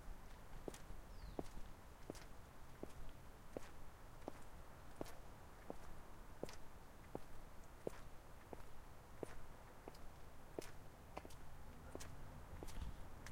kroki-po-chodniku
[pl] Wczesna wiosna, kroki w męskim obuwiu na chodniku betonowym.
V4V
[eng] Early spring, steps in men's shoes on a concrete pavement.
V4V rulez
walks, kroki